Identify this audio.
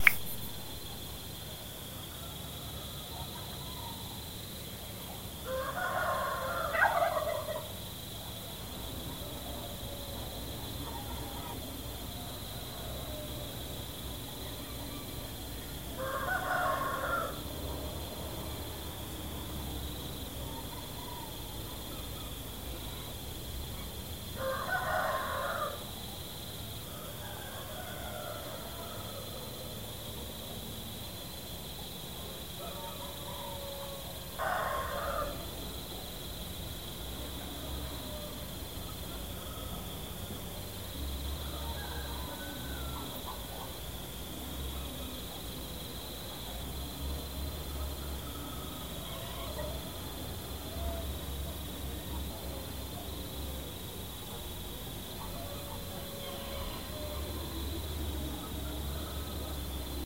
Gravado às 06:00 da manhã no quintal de uma casa em uma cidade pequena.
(Recorded at 6:00 am in a little town)
Galo contando, grilos e um peru.
Gravado com celular Samsung Galaxy usando o App "Tape Machine Lite".
(Recorded with Samsung Galaxy using "Tape Machine" App for Android)
16 bit
Mono